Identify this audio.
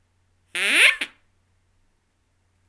One ascending quack. Produced by Terry Ewell with the "Wacky QuackersTM" given for "Ride the DucksTM."
duck, quack, fake